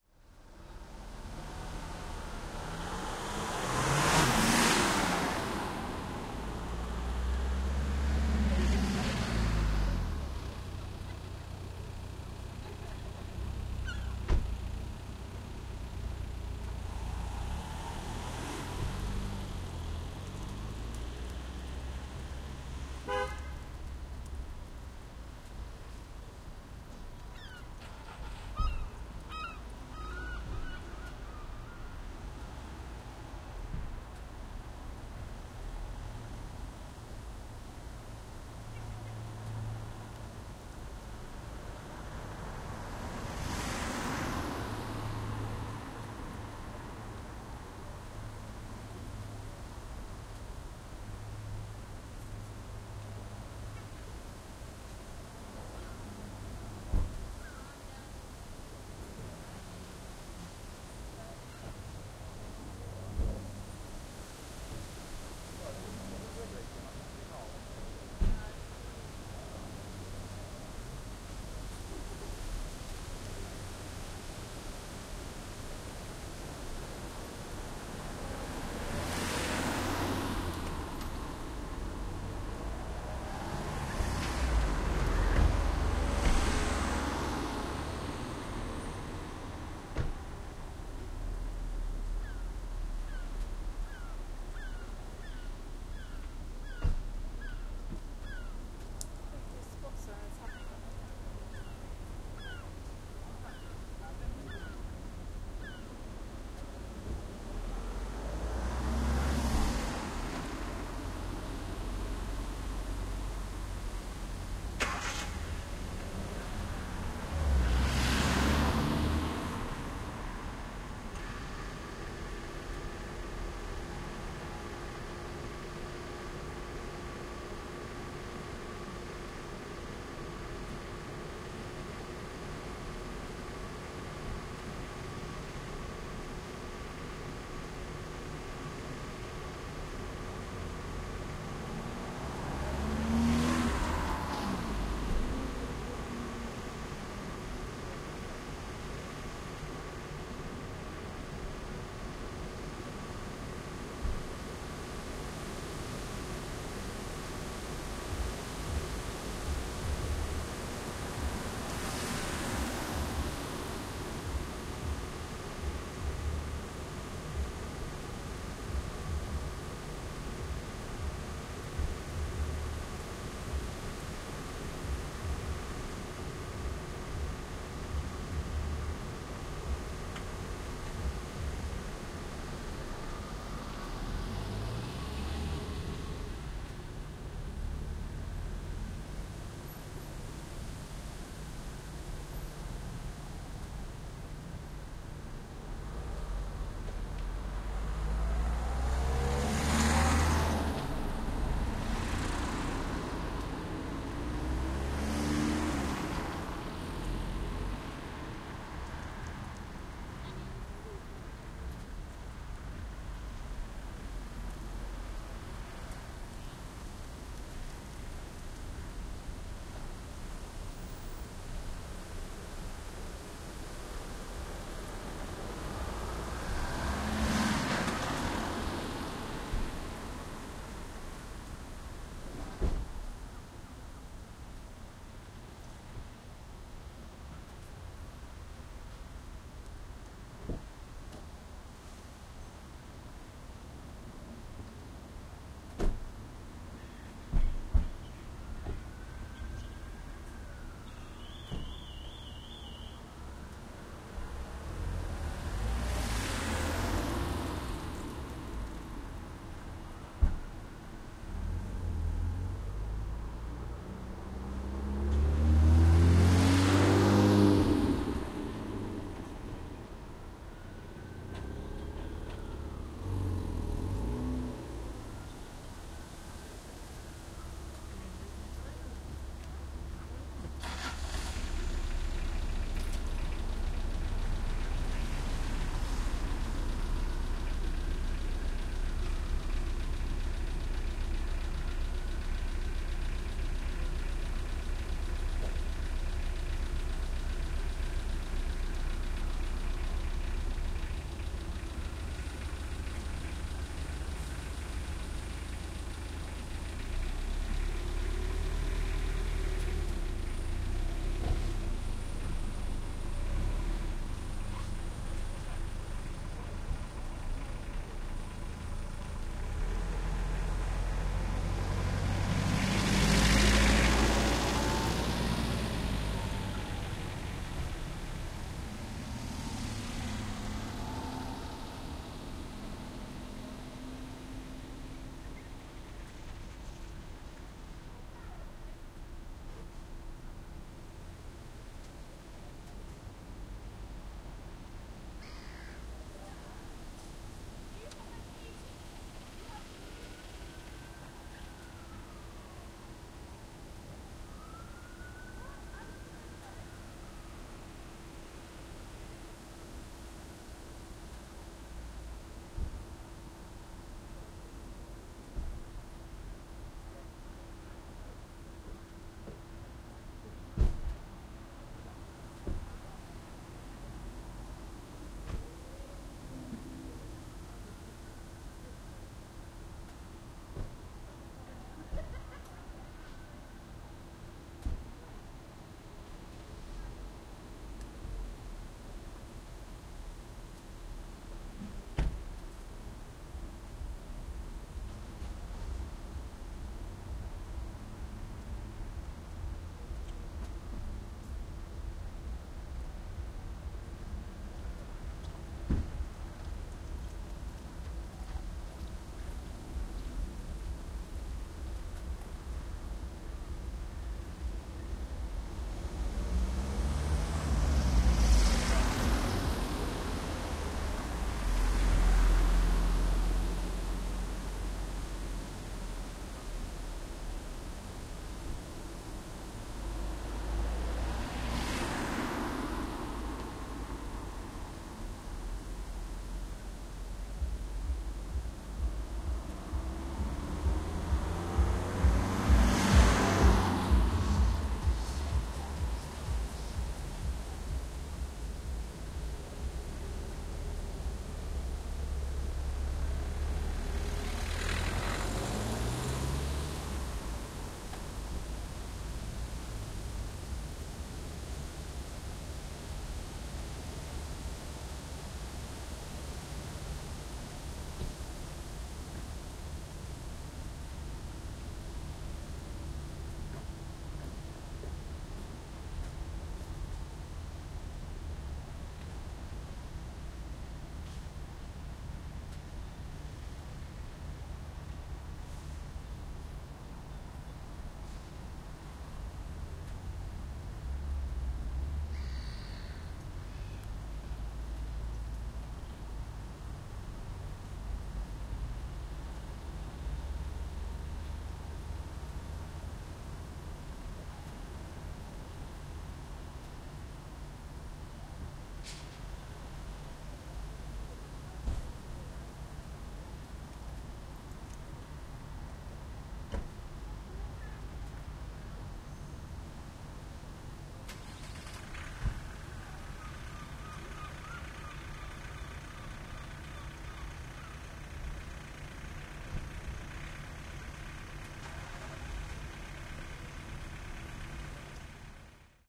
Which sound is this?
Larger Car Park
A busy supermarket car park with lots of traffic coming and going. Cars driving round looking for places to park. Doors opening and closing, people talking.
Olympus LS-5, internal mics, 15Hz low cut
big, car, carpark, cars, closing, doors, large, lot, music, opening, park, parking, passing, people, reversing, revving, talking, vehicles